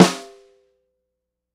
Unlayered Snare hits. Tama Silverstar birch snare drum recorded with a single sm-57. Various Microphone angles and damping amounts.